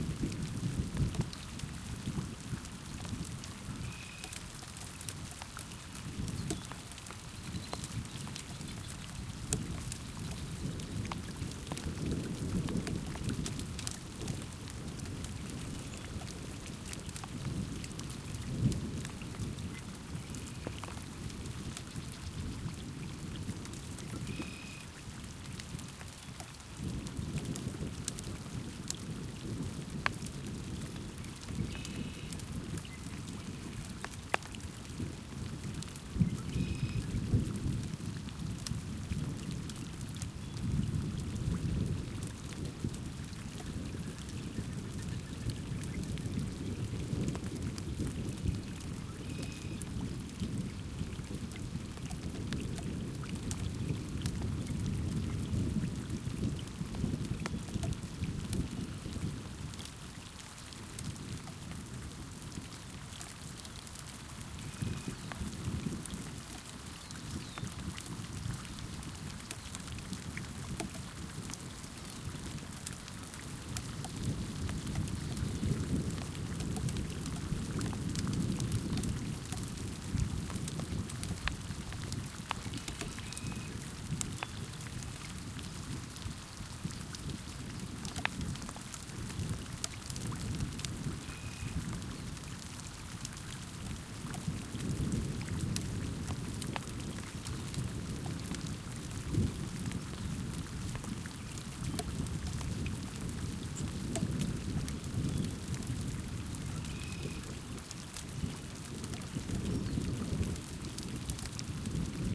Recorded at Walden Ponds in Boulder, Colorado, 2015. A spring rain recorded with a zoom handy recorder placed in the rushes next to the pond. Lots of birds and continuous, rolling thunder. Like all of my nature soundscapes, this sound will loop seamlessly.
Blackbird, boulder, calls, colorado, Drops, field-recording, Marsh, Rain, Reeds, Rushes, Shower, Spring, Thunder